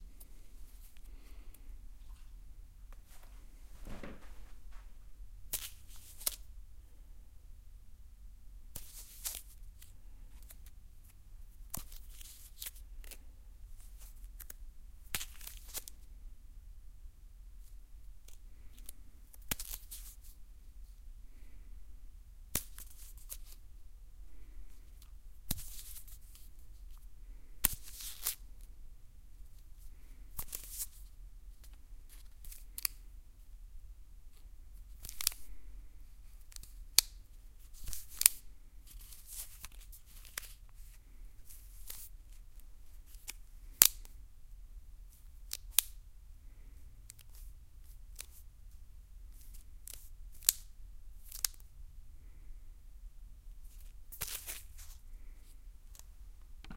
roll of money exchanging hands
Roll of money wrapped in a rubber band exchanging hands. Many takes.
foley, hands